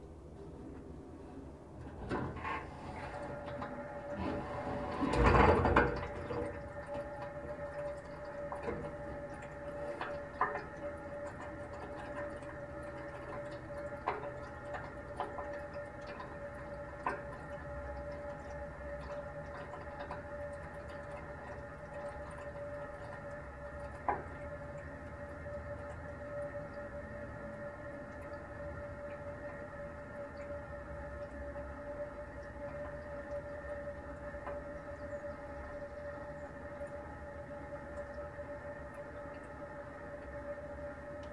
radiator ST
opening the valve of a wall radiator zoom h4n
eerie, radiator, valve, water